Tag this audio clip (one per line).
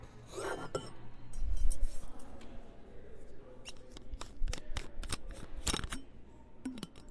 cap
metal